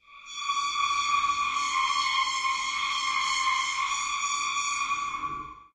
Creaking Metal - With Metallic Reverb
Squealing sound that switches between the left and the right speaker channels, making an eerie, metallic, and slightly distant effect.
This sound is a modification from the sound "Creaking Metal Desk".
Recorded with: Shure SM57 Dynamic Microphone.
Alien, Eerie, Effect, Metal, Sci-Fi, Squeaking, Squealing, Stress